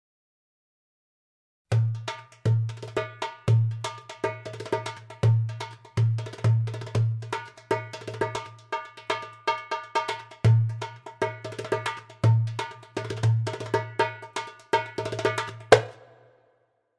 05.Kalamatianos 16th notes and trills solo
This is a widely spread Greek rhythm and dance. Most commonly notated as a 7/8 rhythm. The name originates from an area in Peloponisos. This recording has some variations and trills deviating from the "standard" form.
Musician: Kostas Kalantzis.